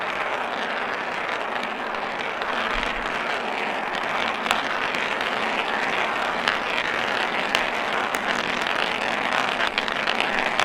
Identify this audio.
Lots of marbles.